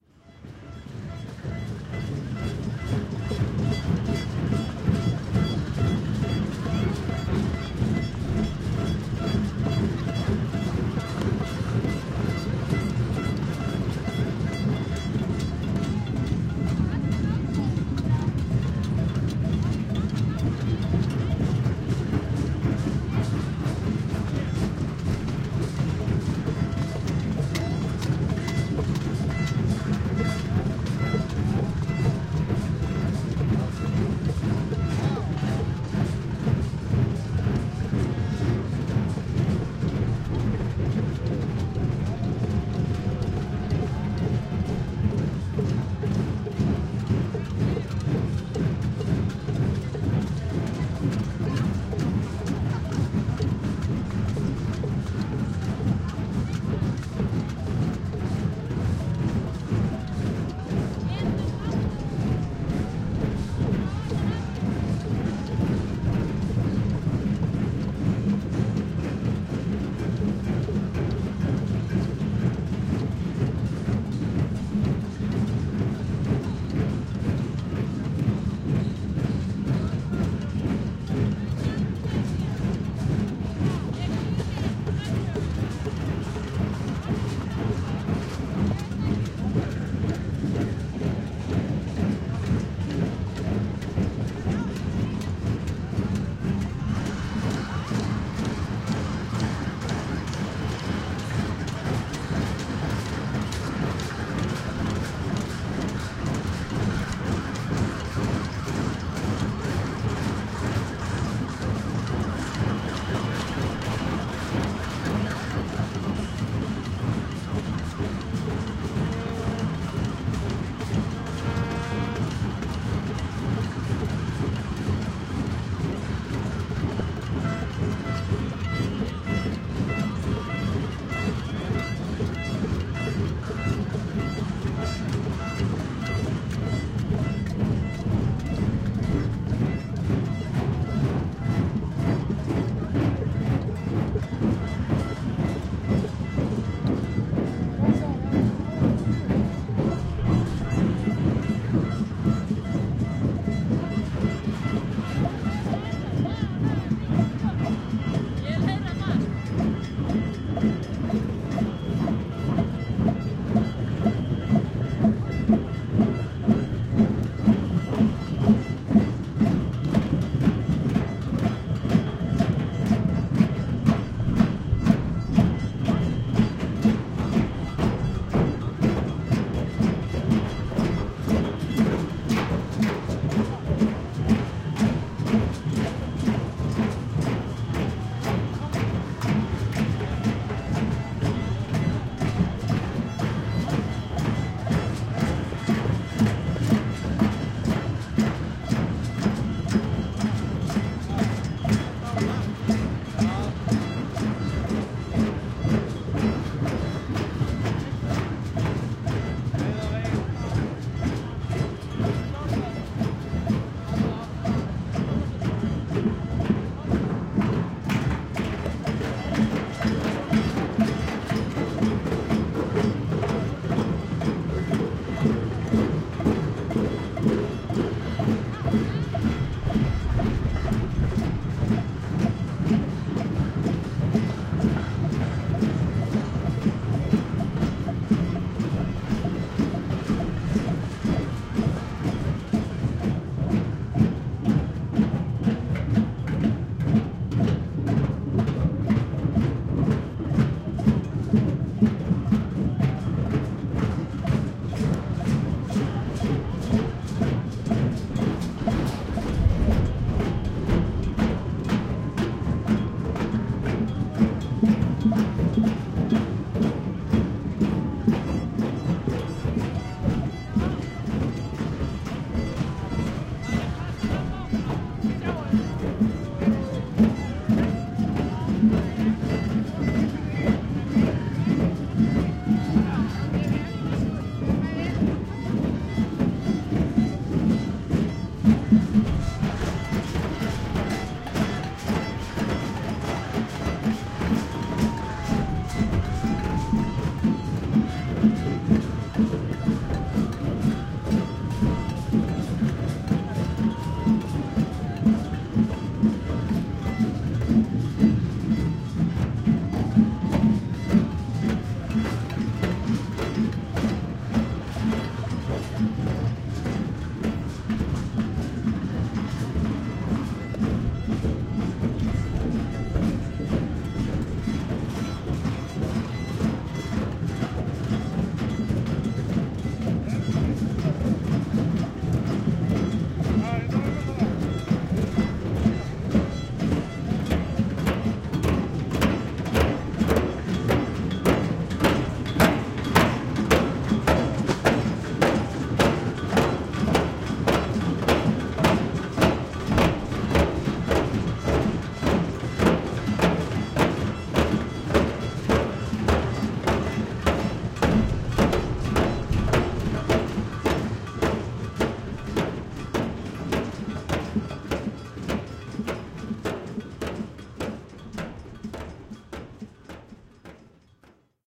Government protest in Reykjavik Iceland at parliament building in Autumn 2010. It was a lively protest.
field-recording, yelling, protest, city, chanting, mob, government, square, crisis, recording, iceland, reykjavik, riot, parliament, chaos, march, people, social, gathering, bang, icesave